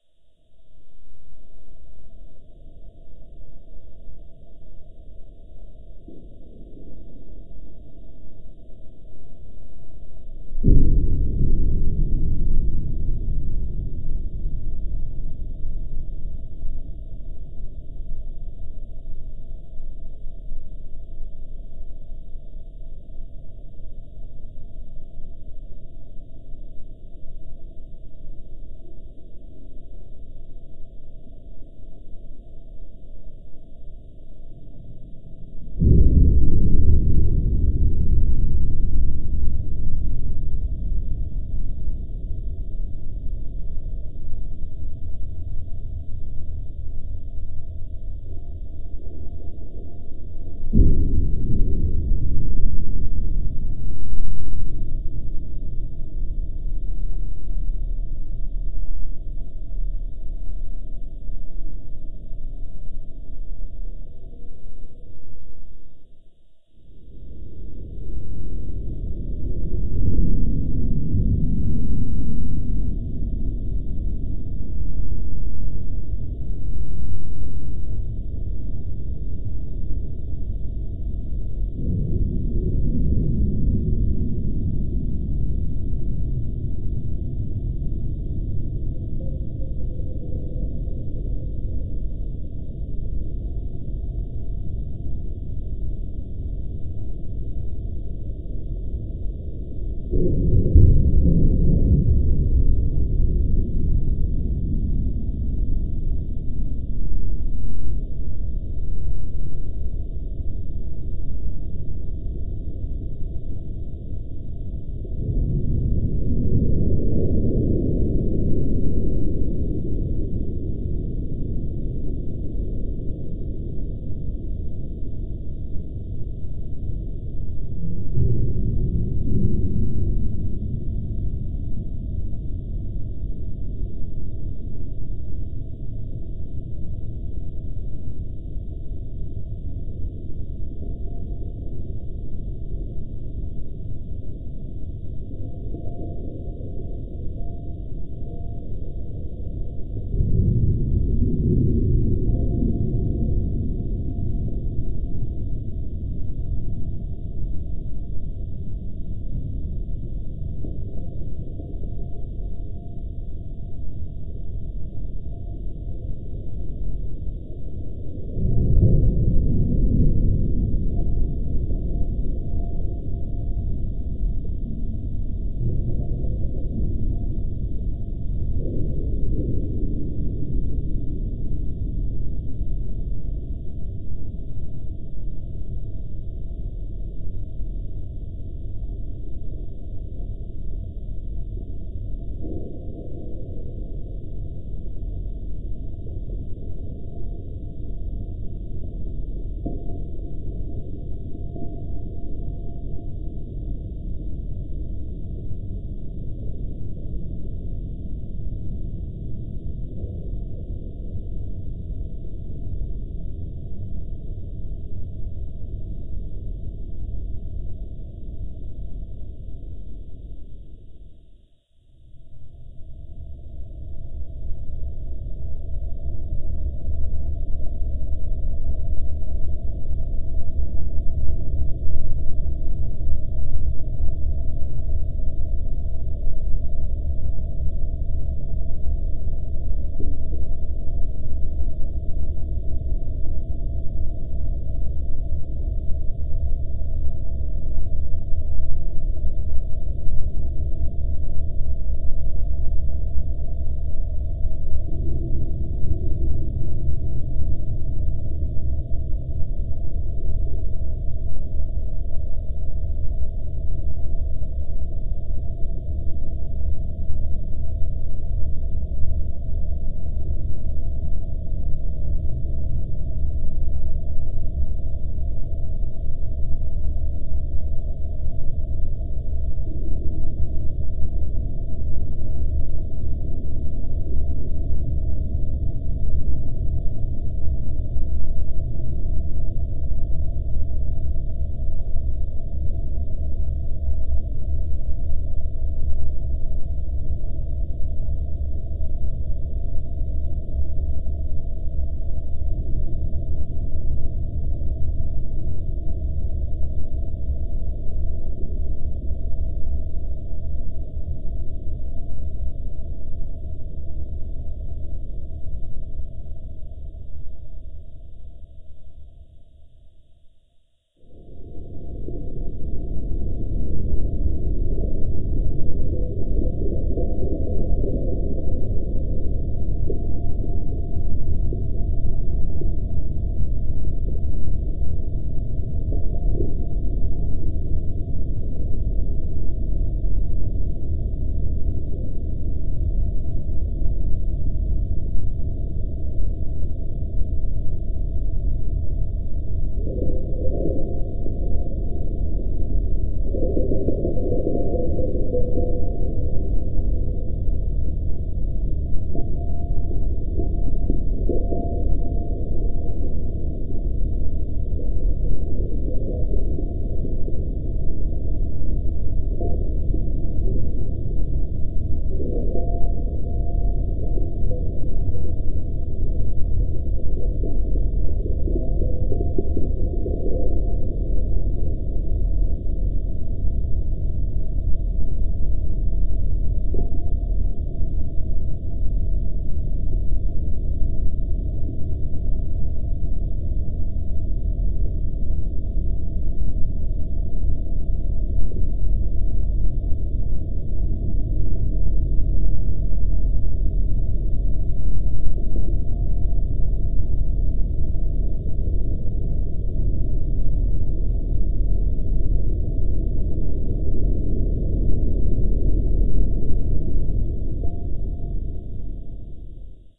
Space Atmosphere Remastered Compilation
For best experience, make sure you:
* Don't look at the sound waves (the sound display) at all!
* Is in a pitch-black, closed room.
* For ultra feeling, turn up the volume to 100% and set the bass to maximum if you have good speakers! Otherwise put on headphones with volume 100% (which should be high but normal gaming volume).
* Immerse yourself.
Space atmosphere. Mysterious sounds and noises.
Sounds used (remastered and compiled!):
This sound can for example be used in action role-playing open world games, for example if the player is wandering in a wasteland at night - you name it!